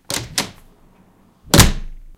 Door (Opening and Closing)
Door (Opening with handle and Closing/Shut sound). Recorded with a Zoom H2n
apartment, close, closing, door, doors, flat, handle, house, open, opening, shut, slam